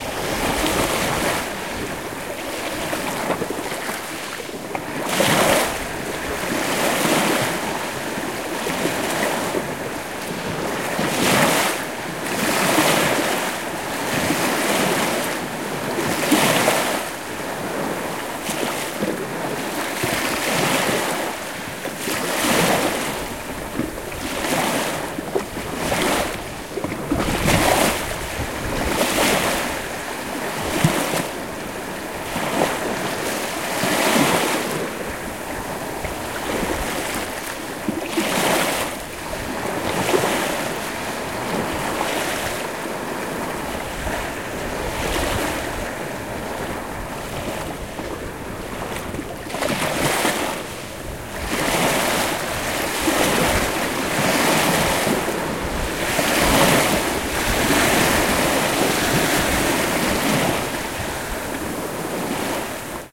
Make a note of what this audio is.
Sea Waves 03
beach, field-recording, sea, waves